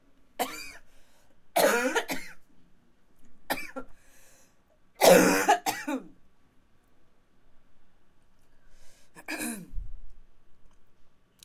Cough Cof Cof Cof
Cough Hard a lil out of the mic's polar pattern
sickness, coughing, cof, sick, cough, unhealthy